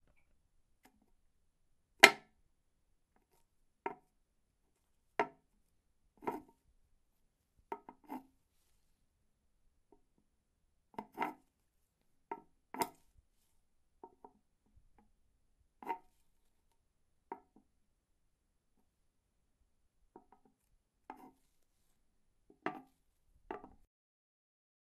Metal Can. pick up and put down
small paint can being picked up and put down
can, container, metal, metallic, tin